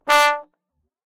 One-shot from Versilian Studios Chamber Orchestra 2: Community Edition sampling project.
Instrument family: Brass
Instrument: OldTrombone
Articulation: short
Note: D3
Midi note: 50
Room type: Band Rehearsal Space
Microphone: 2x SM-57 spaced pair
brass, d3, midi-note-50, multisample, oldtrombone, short, single-note, vsco-2